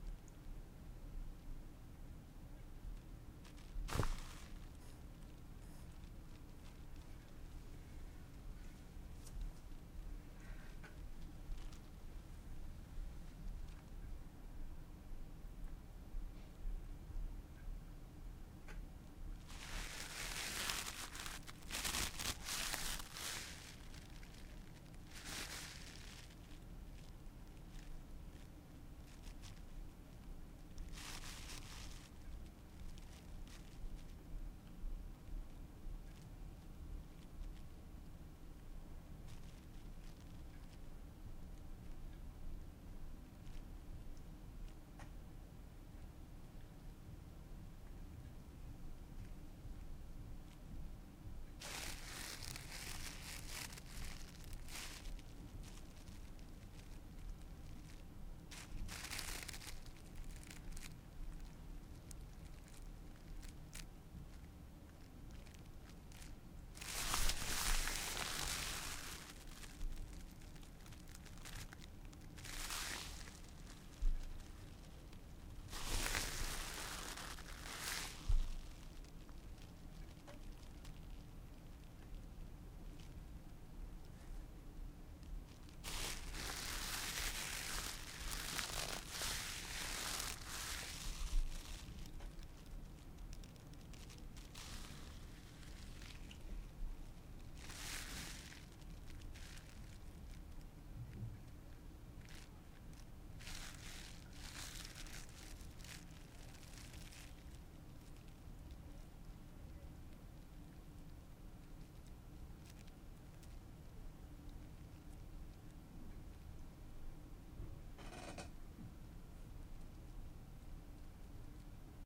Rope Sound
background, foley, cinematic